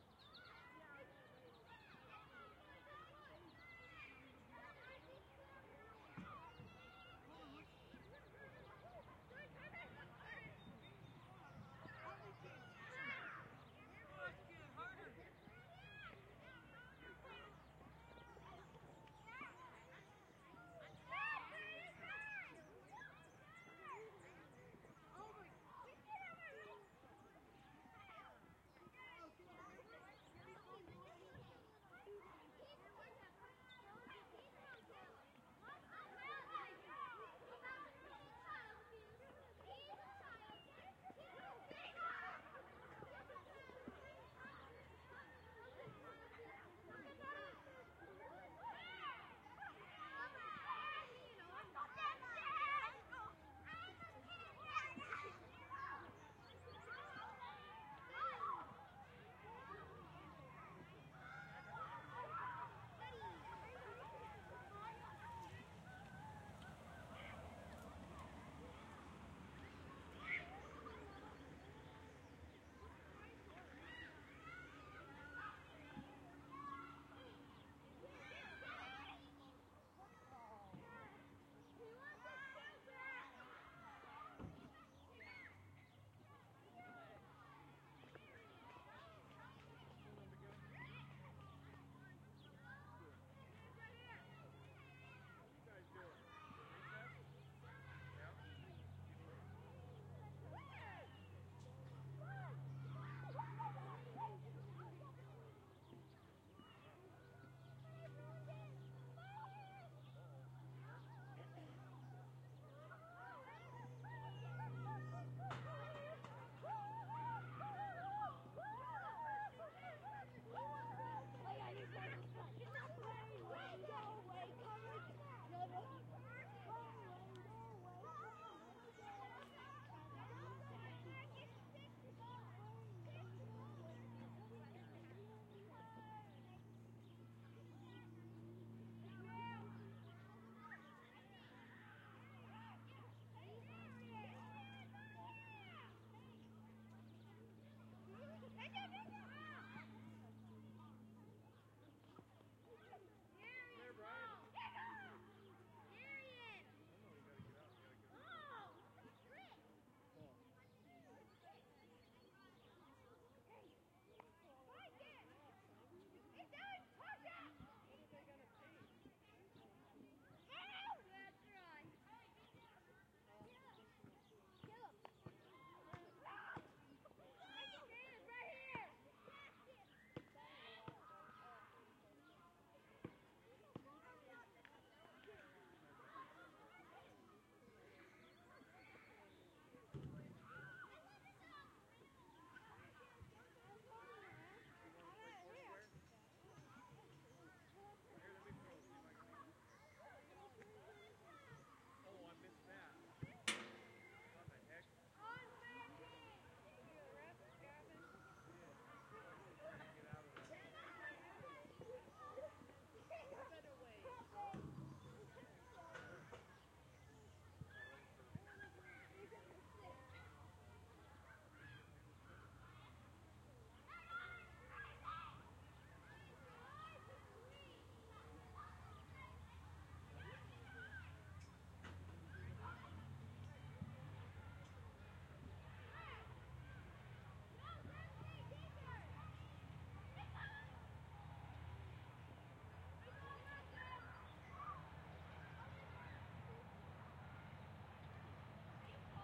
AMB Playground Kids Playing 001
Nice medium distant stereo recording of children playing on a playground. Clean with minimal traffic.
Recorded with: Fostex FR2Le, BP4025